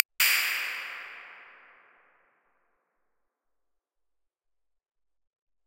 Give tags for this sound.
reverb fx click impuls-response downlifter digital crash roomworks

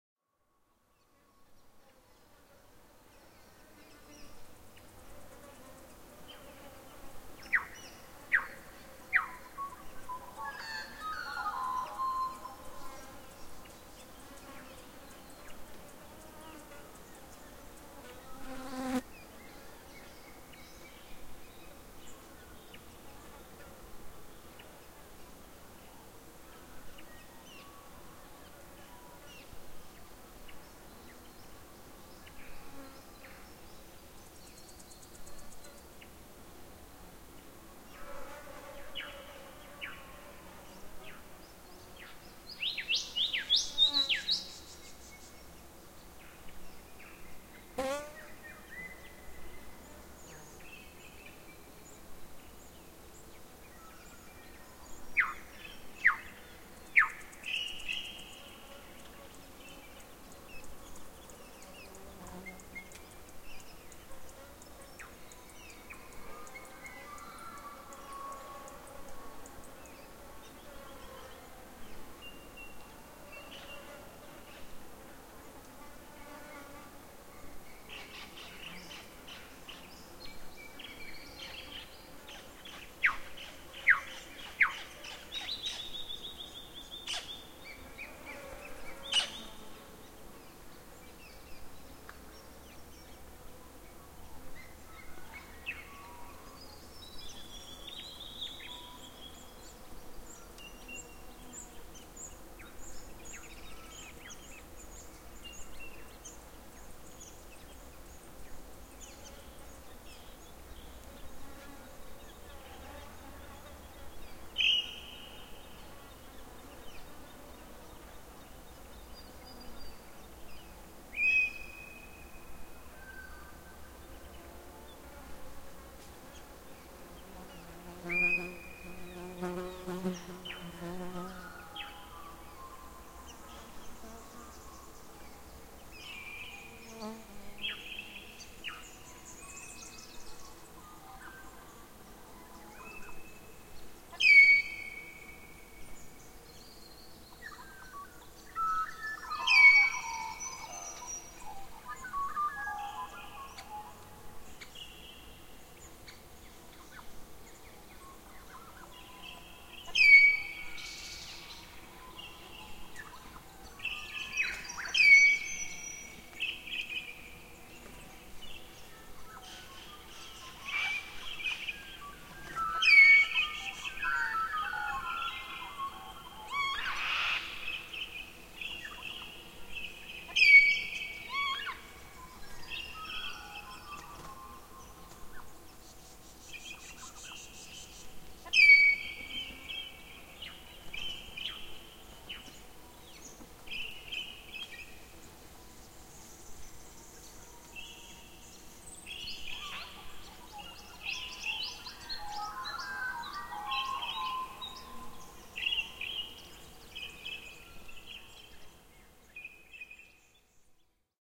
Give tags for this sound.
australia australian currawong insects magpie

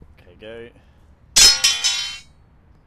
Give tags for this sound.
Clang Clank Crowbar Drop Metal

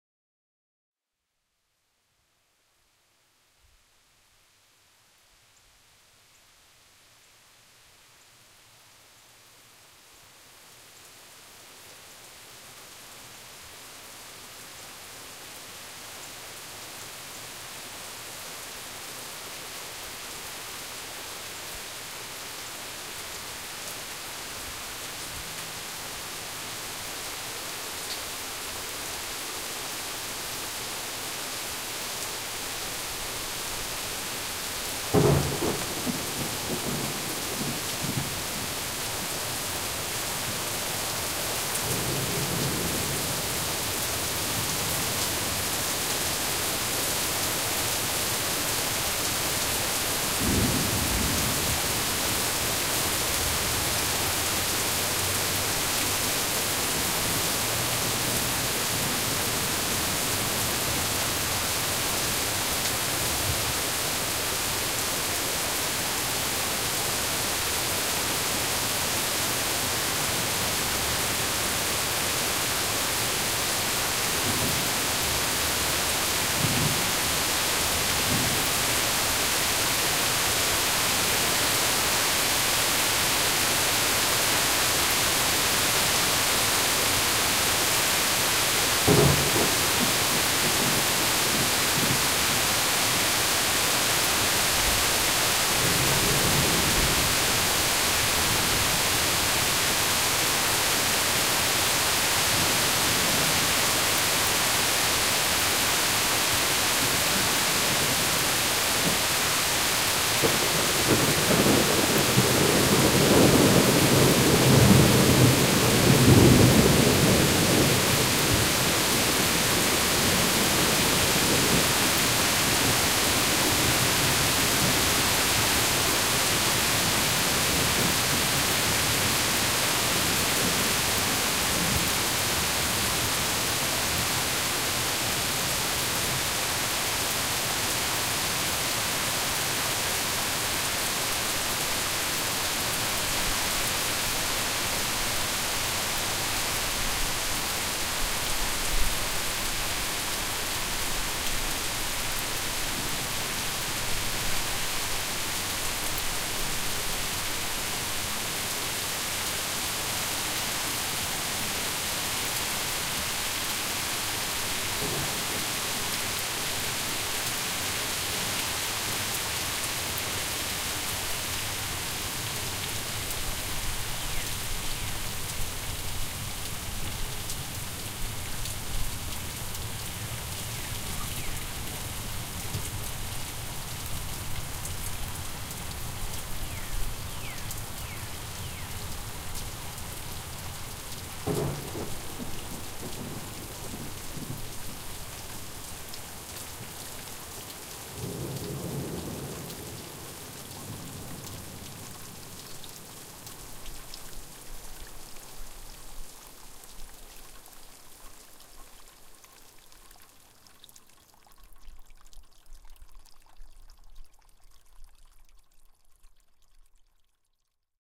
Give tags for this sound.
ambient field-recording lightning nature rain raining sound-effect storm thunder thunder-storm thunderstorm water weather

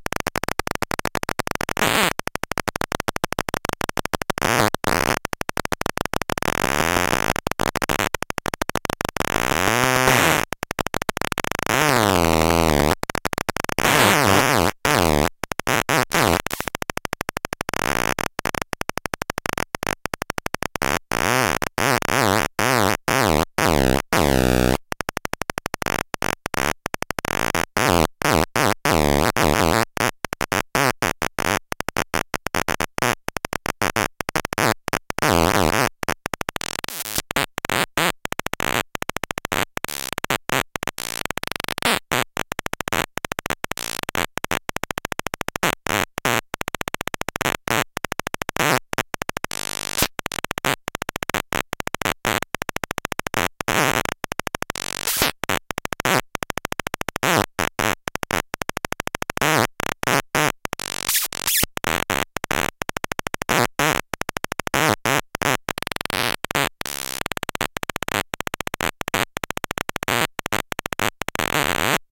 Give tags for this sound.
click clicks

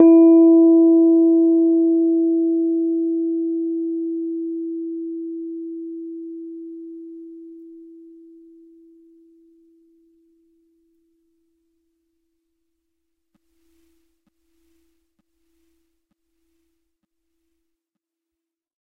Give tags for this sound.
electric fender keyboard multisample piano rhodes tine tube

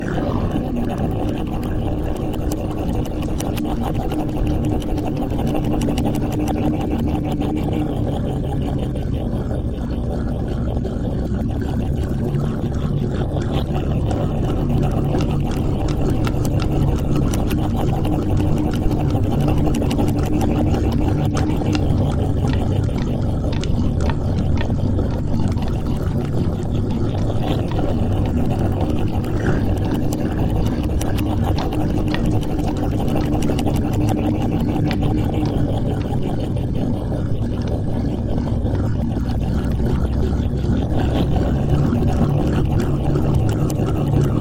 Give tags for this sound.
foley sfx voice

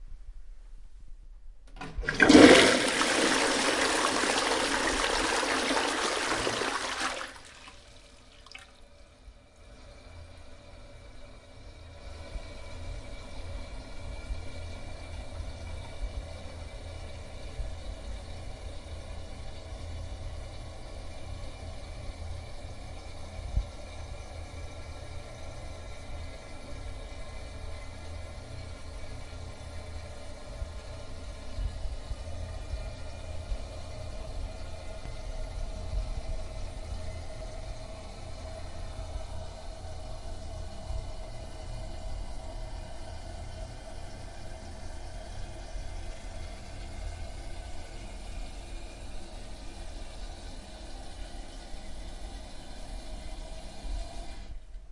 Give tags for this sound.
bathroom flush flushing restroom toilet washroom

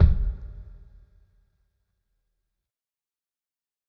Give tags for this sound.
record home pack kick god drum kit

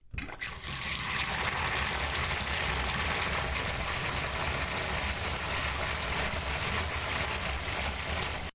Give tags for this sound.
room water zoom

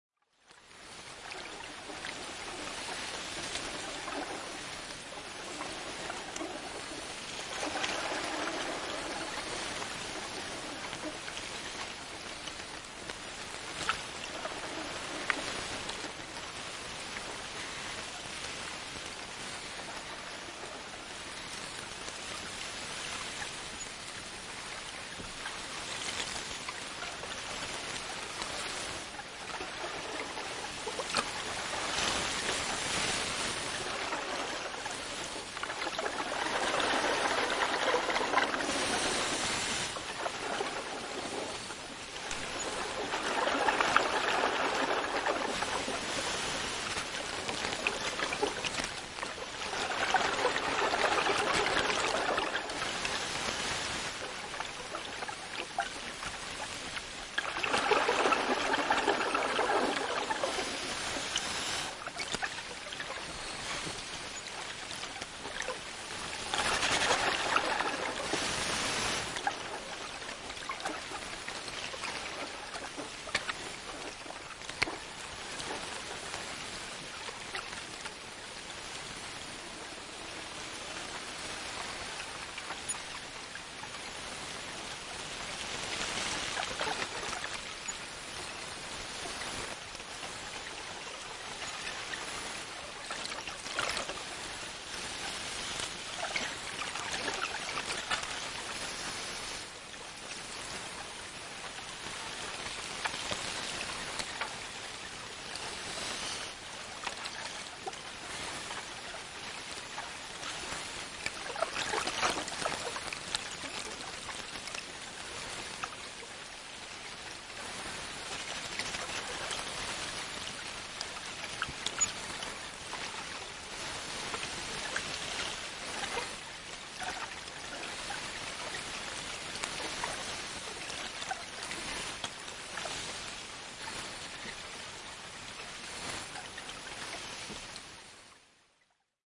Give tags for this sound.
Bubble Solina Yleisradio Kasvillisuus Bubbles Bubbling Hengitys Rustle Sukeltaja Scuba-diving Diver Kahina Vedenalainen Finnish-Broadcasting-Company Diving Underwater Suomi Kuplat Vesi Tehosteet Field-recording Yle Finland Sukellus Vegetation Breathing Laitesukellus Soundfx Water Gurgle

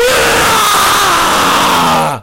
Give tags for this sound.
loud screaming voice

